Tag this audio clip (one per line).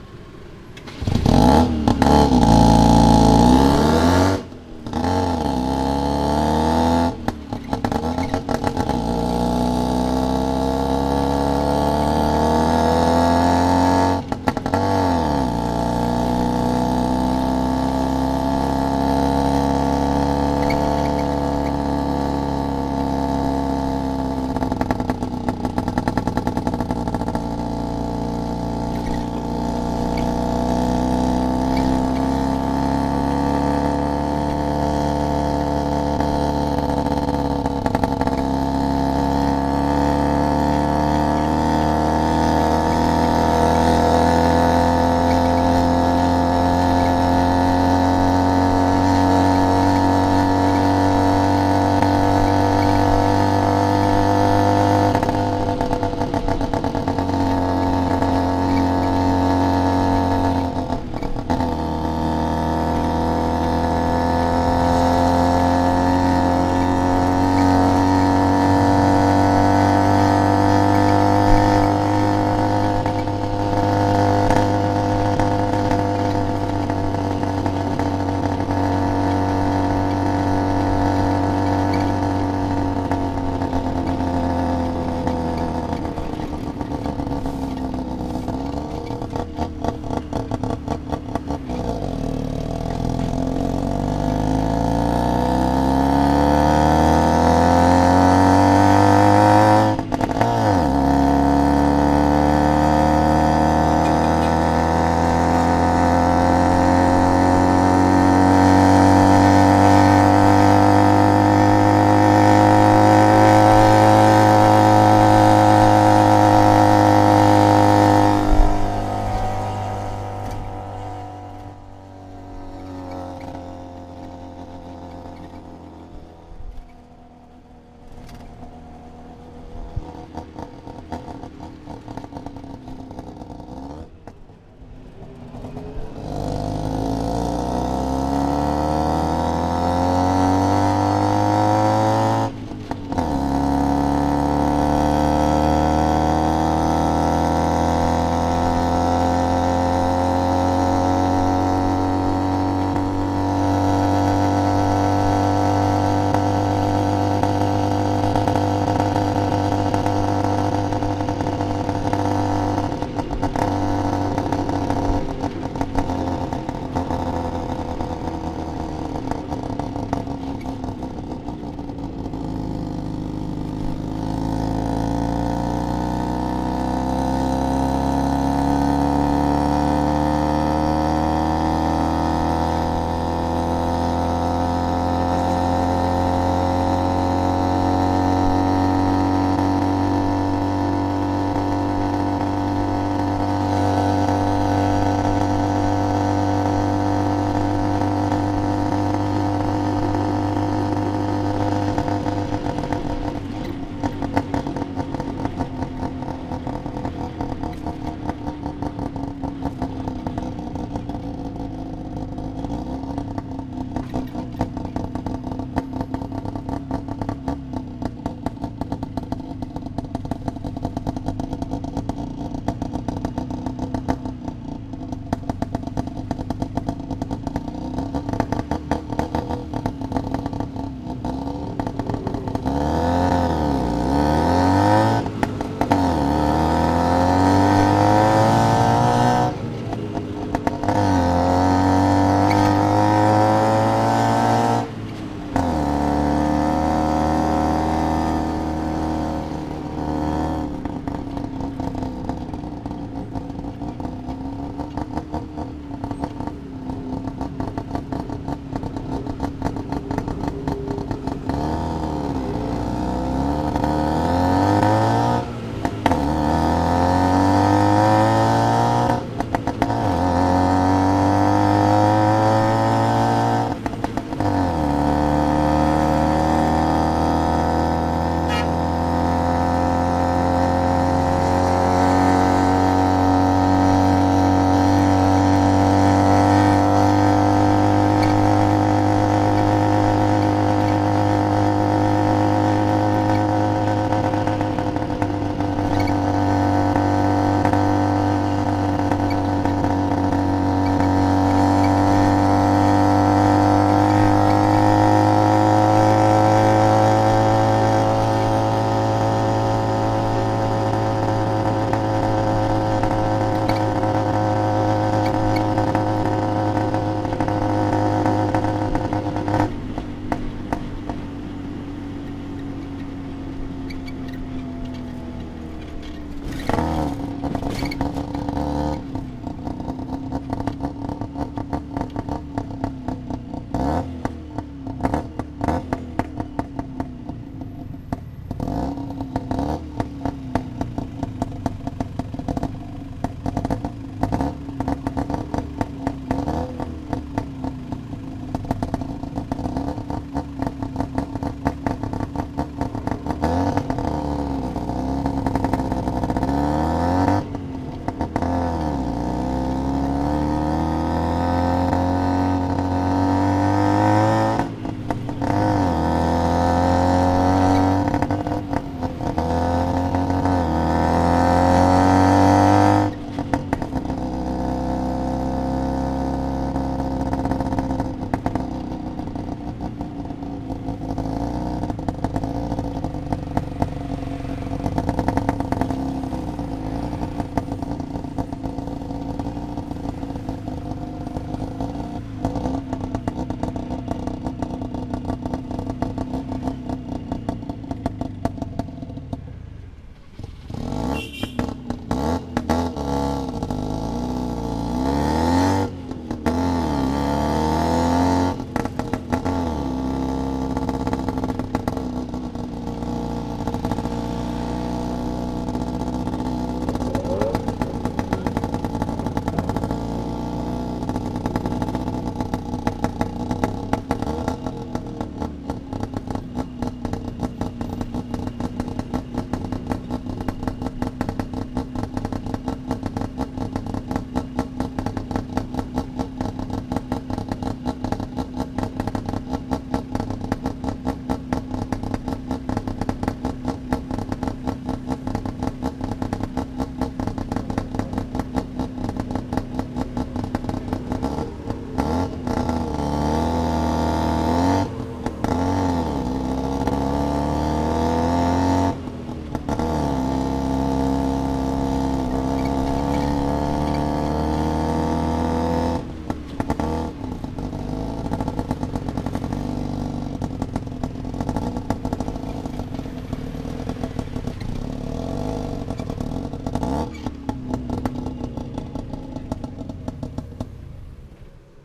exhaust
field-recording
motorcycle
on-board
taxi
Thailand
tuk
various